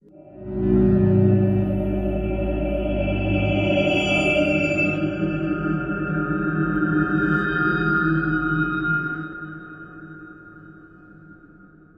ab emptywarehouse atmos
sounds like a empty wharehouse horror
ambient, atmospheres, drone, evolving, experimental, horror, pad, sound, soundscape